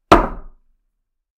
Wood Impact 1
This is a recording of me dropping a heavy socket on a table in such a way that it doesn't tumble.